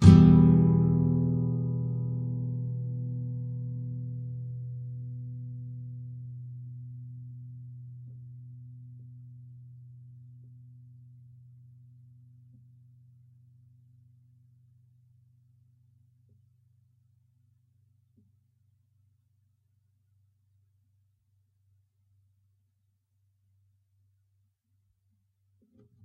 Emin full
Standard open E minor chord. Down strum. If any of these samples have any errors or faults, please tell me.
acoustic, clean, guitar, nylon-guitar, open-chords